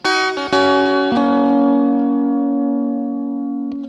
guitar.coda03
a short coda played with Ibanez electric guitar, processed through Korg AX30G multieffect (clean)
musical-instruments, electric-guitar